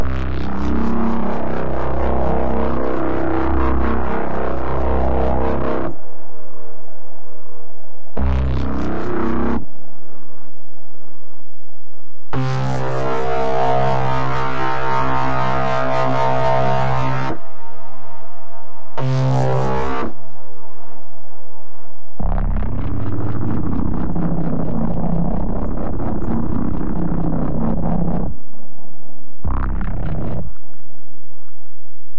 Some wobbly bass sounds from Lazerbass in Reaktor
synth, bass